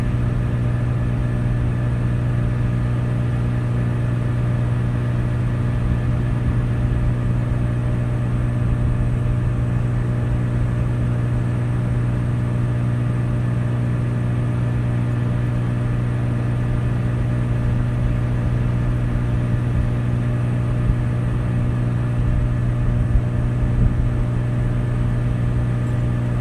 hum of a machine behind metal door
Hum of some kind of industrial machine, recorded through a metal garage door in East New York, Brooklyn. Recorded with an iPhone.